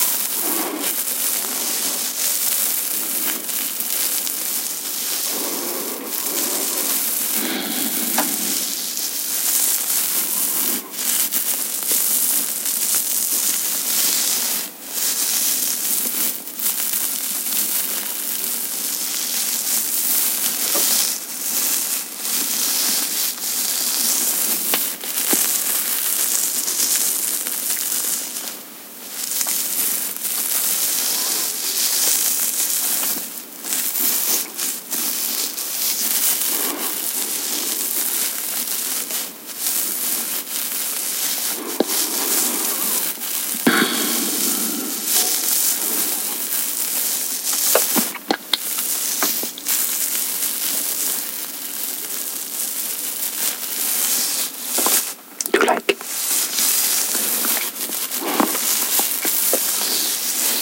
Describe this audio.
20090405.hairy.chin
close-up of the noise of my chin being scratched, mono recording. Sennheiser MKH 60 into Shure FP24 preamp, Edirol R09 recorder
beard, body, closeness, female, fetish, hair, male, scratching, sex